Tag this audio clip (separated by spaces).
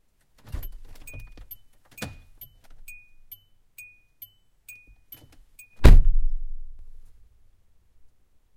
car door elantra foley h1 opening zoom